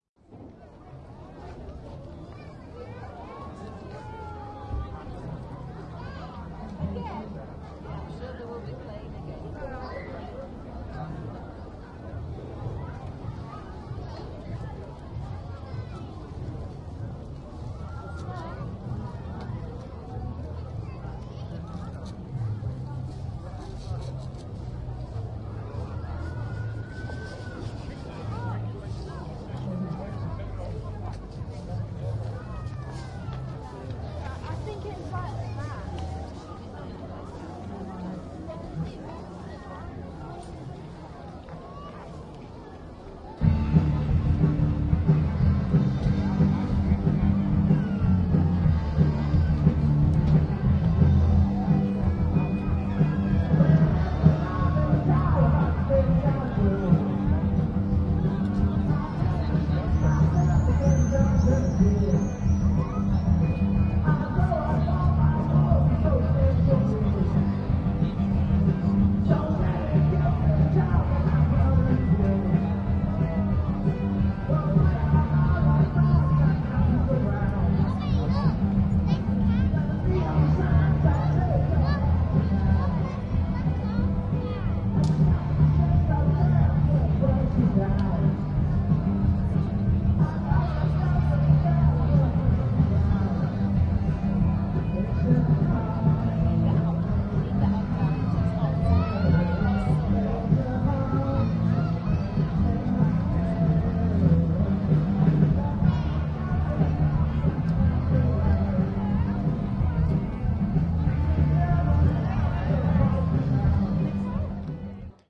STREET AMBIENCE 2
This is two of three stereo images that I captured on the 24th May in Market Weighton in East Yorkshire at an annual village event called "The Giant Bradley Day". It was a very hot day and I wandered up and down the crowded main street amongst stalls, food sellers and children's entertainers.